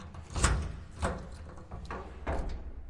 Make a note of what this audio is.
2 Steel cabinet door
Unlocking steel cabinet